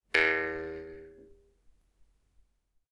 Jew's Harp, Single, A (H1)
Raw audio of a metal jew's harp being played with the vowel 'A/E' with no vibrato or breathing. Recorded simultaneously with the Zoom H1, Zoom H4n Pro and Zoom H6 to compare quality.
An example of how you might credit is by putting this in the description/credits:
The sound was recorded using a "H1 Zoom recorder" on 11th November 2017.